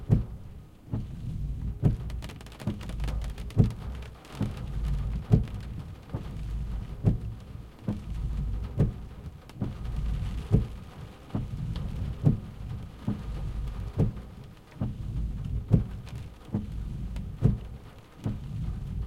Windscreen Wipers in the Rain Loop (2)
Fully Loopable! Rain and wind with wipers on a medium speed recorded inside a car.
For the record, the car is a Hyundai Getz hatchback.
The audio is in stereo.